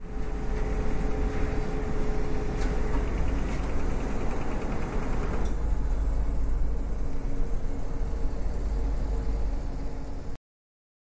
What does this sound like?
Turn On Printer
Sounds of photocopier or printer when it's turn on. It sound like a noise with a small sounds of internal mechanism.
Tallers, campus-upf, photocopier, noise, printer, UPF-CS14, turn-on